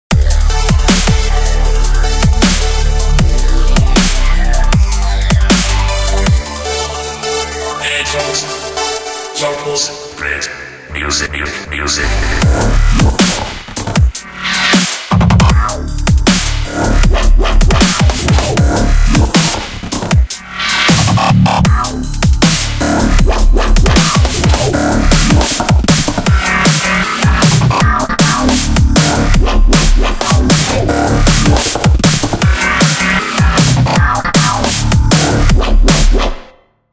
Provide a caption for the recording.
Grit Music Loop
This is a loop from a completed track of mine, like always, do what ya bloody like with it lol ;)
limters, fruity-loops, house, loop, bass, clip, mastering, free, synths, dubstep, eq, drums, sample, flanger, electronic, beat, trance, club, fx, practise, 2013, comppression, delay, reverb